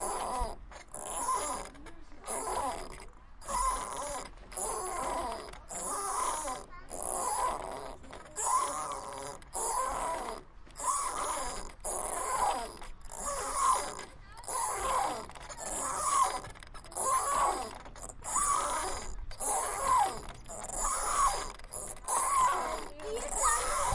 Field-recording of a squeaking swing at a playground.
Recorded with Zoom H1
squeak, squeaking, squeaky, swing, swinging